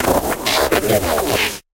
This sound was created by processing my own footsteps with a combination of stuttered feedback delay, filter modulation (notched bandpass + lowpass LFO), and distortion (noise carrier + bit crushing).
machinery, noise, sci-fi, motor, industrial, drone, machine, mechanical, engine, robotic, factory